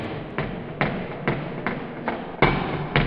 Slowed Down Consecutive Hits on a Trash Can
Consecutive slowed down hits on a trash can with added reverb.
Can, Hits, MTC500-M002-s14, Trash